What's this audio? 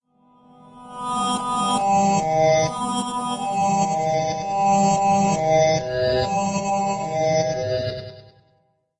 Goodness Only Knows (Guitar)
Some weird backwards guitar plucks.
Recorded by me using a synthetic guitar thing for learning to play, and processed with Audacity.
guitar, loop, electronic, weird, music